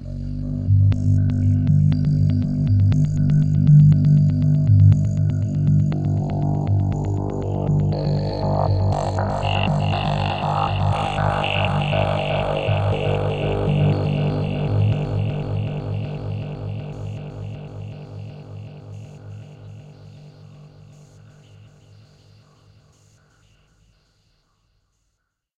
Deep gated vocal with delay
My voice running through a vocal effects pedal then rhythmicly filtered and delayed in the octatrack.
delay, effects, rhythmic, vocal